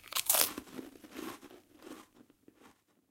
Eating a cracker with my mouth closed. I have another sound where I eat a cracker with my mouth open.
Recorded with a Tascam DR-05 Linear PCM recorder.